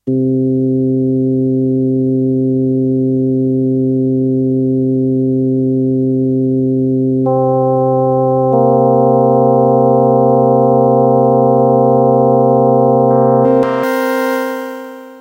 Recordings of a Yamaha PSS-370 keyboard with built-in FM-synthesizer